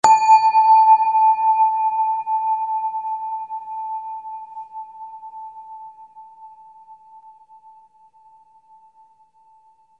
Synthetic Bell Sound. Note name and frequency in Hz are approx.